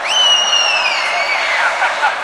Sound belongs to a sample pack of several human produced sounds that I mixed into a "song".